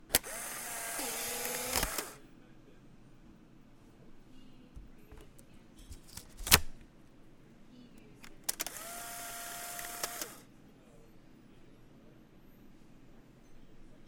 polaroid with and without film
Shooting a Polaroid 600 series camera. First one is with film, the second without film.
photography, bluemoon, foley, polaroid, sound-museum, whirr, camera